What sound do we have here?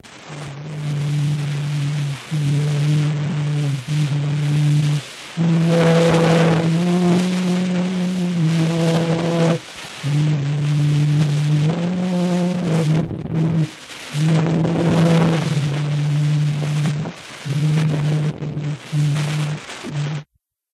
First Sounds has pioneered the recovery of sounds recorded on phonautograms - many of which were made before Edison invented the phonograph in 1877. This sample is among the world's earliest sound recordings and dated 1860. The sound files of Édouard-Léon Scott de Martinville's phonautograms released in 2008 by the First Sounds collaborative were created using Lawrence Berkeley National Laboratory's virtual stylus technology. Unfortunately, as these phonautograms were not made to be played back, they do not adhere to the most fundamental technical requirements of sound recording; their tracings are "malformed." Because modern audio processing software cannot handle such malformations, these precious phonautograms have remained mute. In the quest to better understand the work of pioneer phonautogram makers, Dr. Patrick Feaster of Indiana University, Bloomington, has devised an alternate approach to playback.